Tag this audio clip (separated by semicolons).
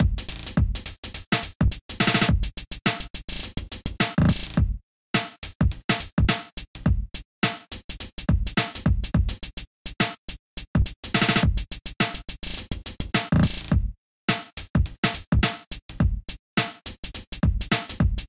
cut; hiphop; stuff